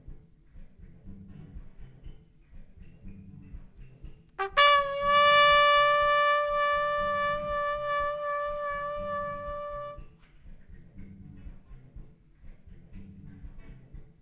This sample was made by friend of mine, trumpet player Andrej, in one of our session.
improvised, jazz, nature, trumpet